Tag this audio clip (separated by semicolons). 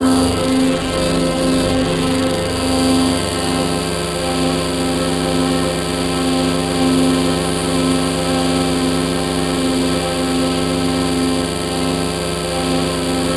Multisample; Binaural; Synth; Texture; Ringmod